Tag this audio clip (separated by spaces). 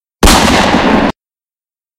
campo-de-grabacion celular